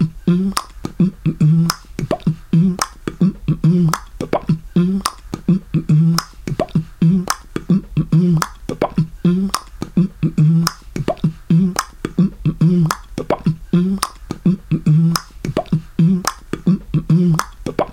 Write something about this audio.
some crappy beatboxing 107bpm